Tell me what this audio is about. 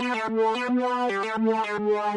vintage synth 01-01-01 110 bpm
some loop with a vintage synth
vintage, synth